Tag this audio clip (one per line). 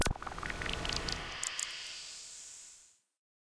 processed
FX
electronic